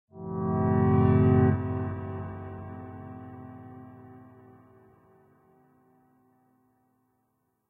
Duby sample with long tail. Dubtechno piece of puzzle